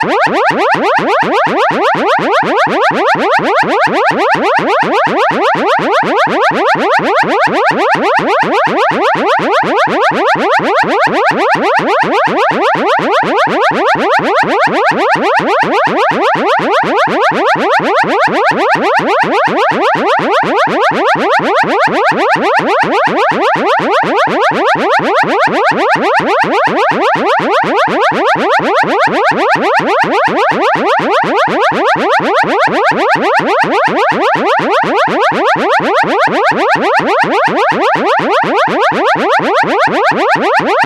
Cartoon-like siren recreated on a Roland System100 vintage modular synth.
cartoon, sci-fi, siren, Synthetic